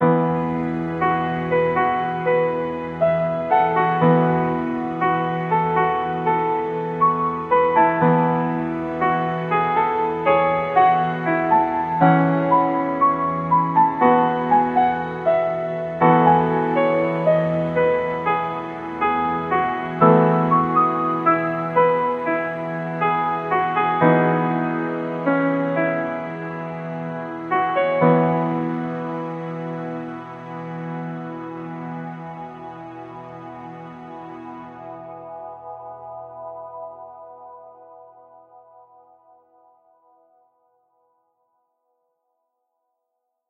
sunny theme
A short calm piano musical theme suitable for using as a soundtrack to a game or a movie scene.
calm, film, piano, relaxing, score, soundtrack, sunny, theme